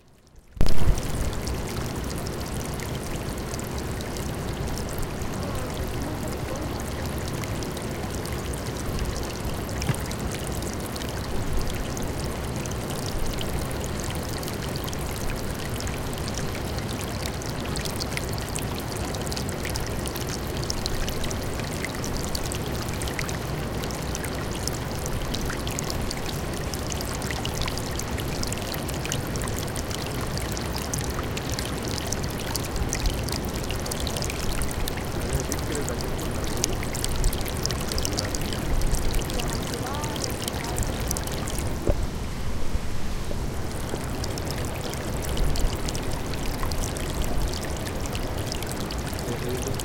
02 font home mort
close recording of water flowing in a small river.
fountain, water